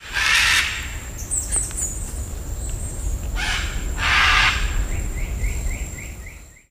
screaming monkey or bird
Not sure if this is a monkey or bird. Recorded with an iPhone in Osa Peninsula of Costa Rica in December 2015.